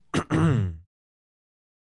clearing throat
Clear throat for a game character
character, clear, throat